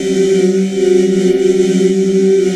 Multisamples made from the spooky living dead grain sound. Pitch indicated in filer name may be wrong... cool edit was giving wacky readings... estimated as best I could, some are snipped perfect for looping some are not.